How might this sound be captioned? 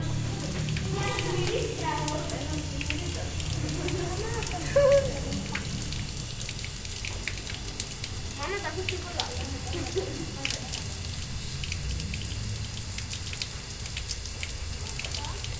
Sonicsnaps from the classroom and the school's yard.